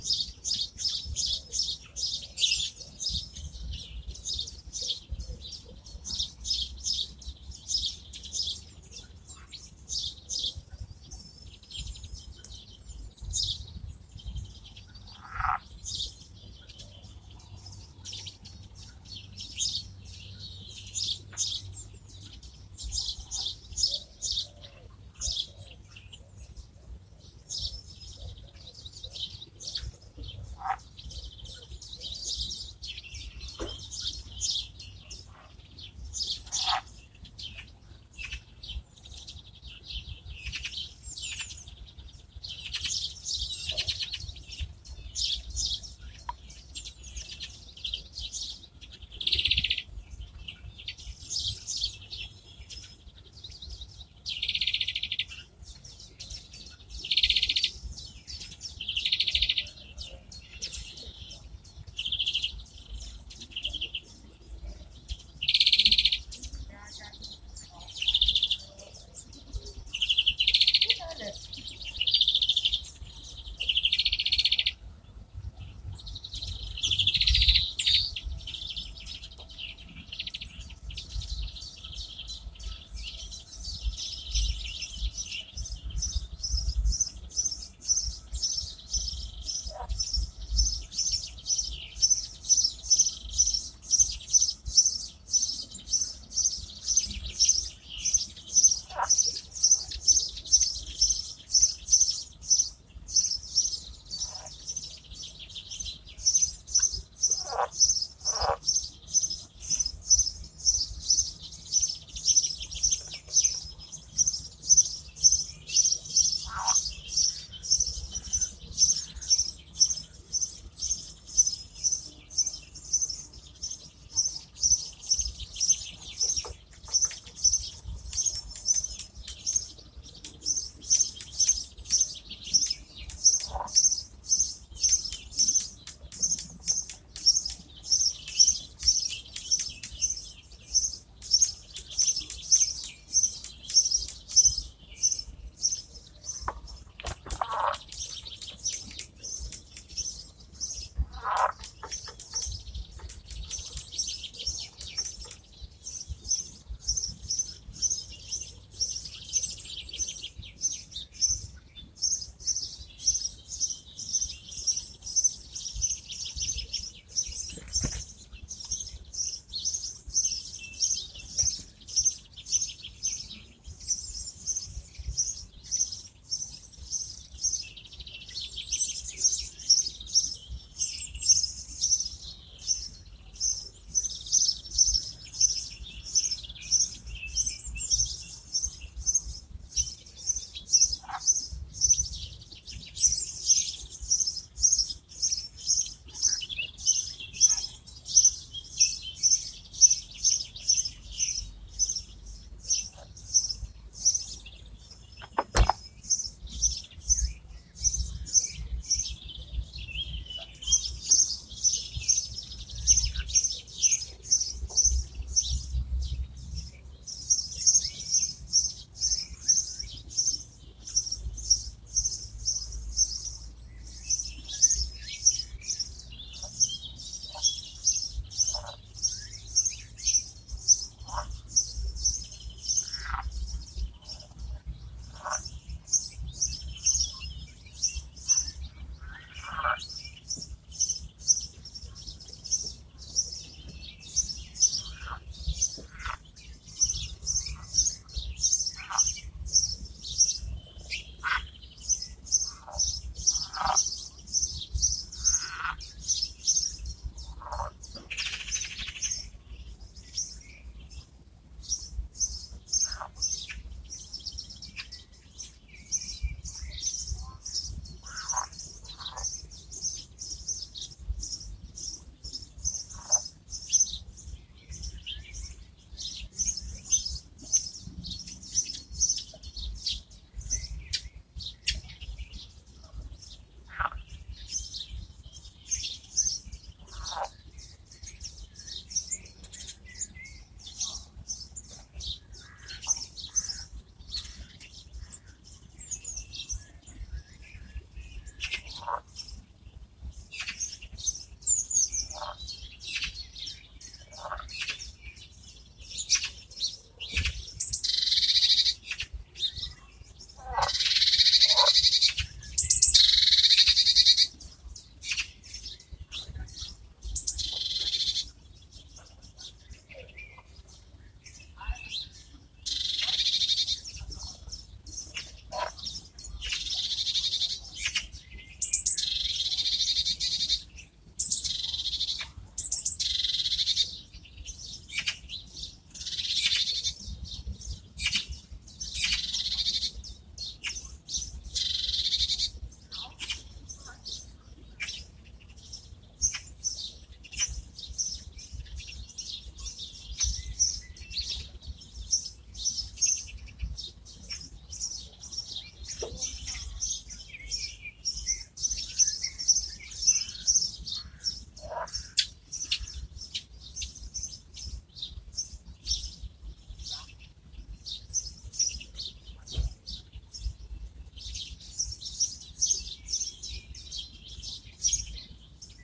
I recorded the birds in my garden using xiaomi redmi note 4 smartphone and an edutige ETM-001 microphone
nature, ambiance, spring, forest, garden, ambience, birds, ambient, field-recording